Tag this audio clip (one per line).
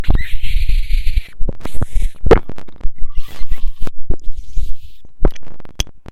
alien
supernatural